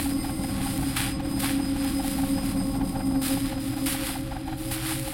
Spell charge loop
Heavily relying on granular synthesis and convolution
charge, loading, loop, magic, wizard, ambience, spell